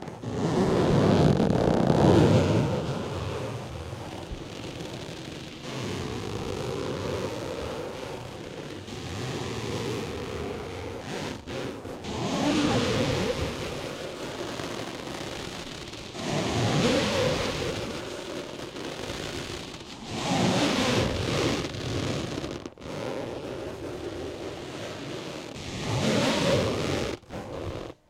Sound of squeezed gym plastic ball